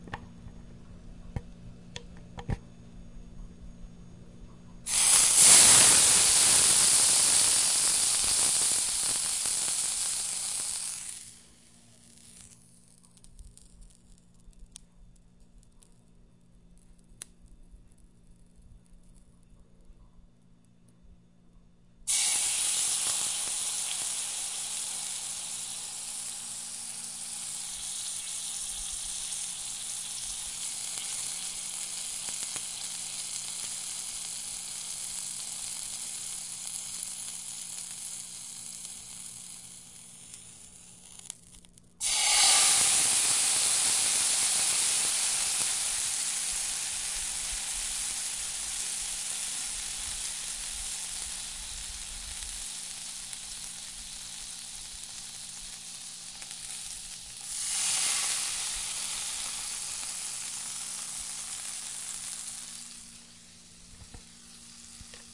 Sound of a cold water dropped in a very hot frying pan